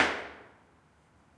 I stomped my feet in a concrete stairwell.